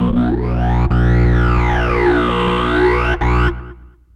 Recorded with a guitar cable, a zoom bass processor and various surfaces and magnetic fields in my apartment. This sound will burn your eyes out...